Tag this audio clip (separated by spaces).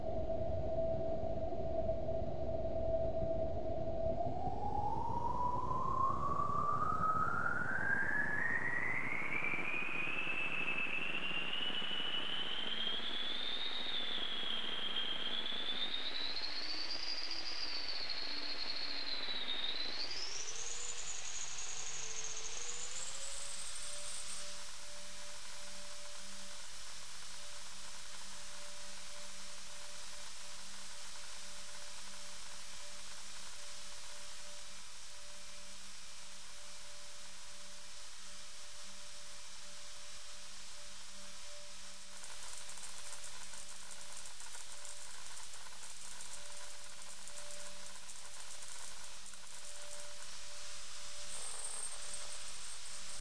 vst synth soundscape wind